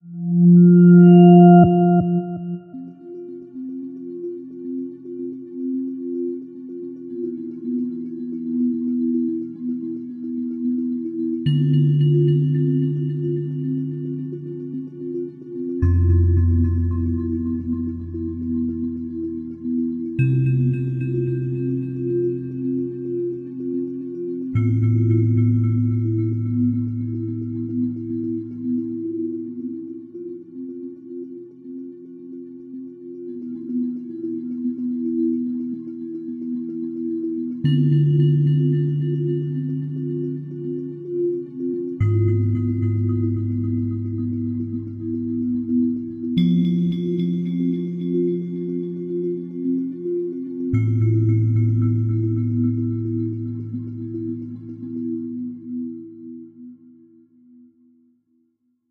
Uneasy Rest
A simple song built in Garage Band for my modded Minecraft Decimation Series. Used in the outro/endcards. If you use this song, please tell me in the comments, so I can check out what you have done with it.